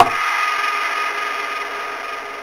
The dungeon drum set. Medieval Breaks